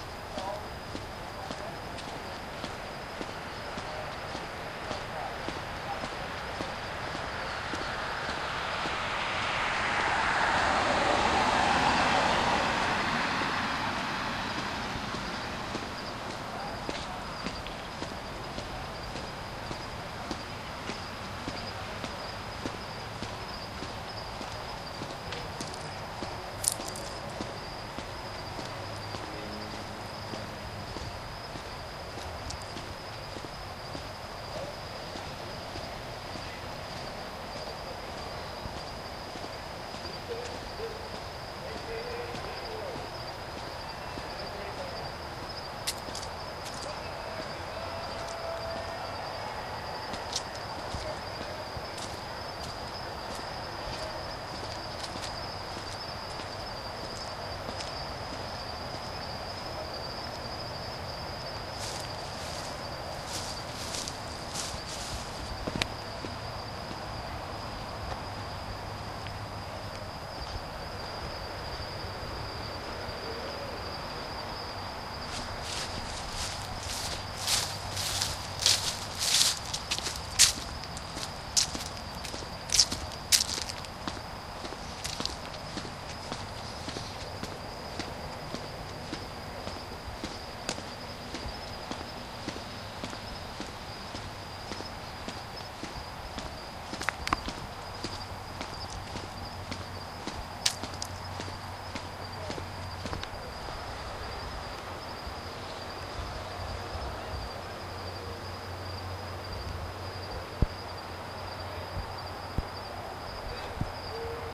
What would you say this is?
A strange amplified event somewhere, could not find it but heard it on the wind and on the DS-40.
field-recording, party